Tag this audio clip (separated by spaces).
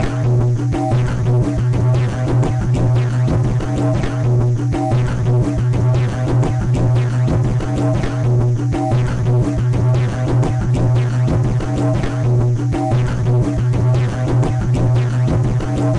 drum improvised percussion-loop